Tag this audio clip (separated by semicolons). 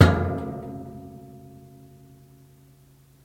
Appliance; Bang; Boom; Door; Hit; Metal; Washing-Machine